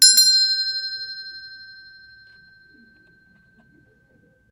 The sound of a bell.